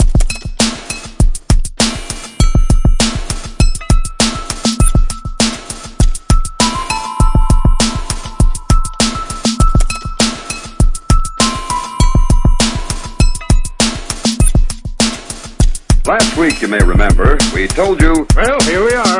Here We Are 100bpm
Minimal Techno beat with voice sample tagged on end. 8 bars. Loop and enjoy!